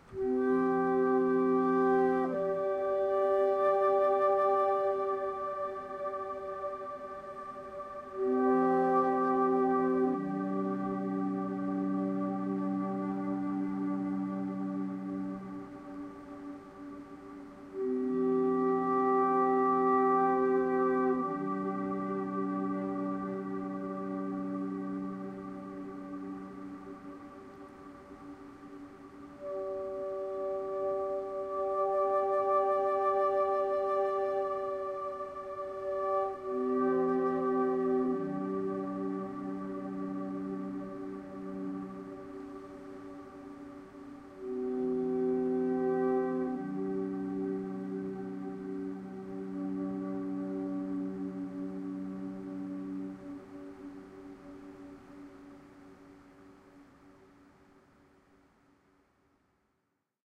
A short sequence. I recorded my flute on a program that adds an effect, an accompanying sound- lower than my flute. Sounds like two instruments. Could add a melancholic or mystical atmosphere to a film or game.
ambient, music